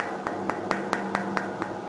Various loops from a range of office, factory and industrial machinery. Useful background SFX loops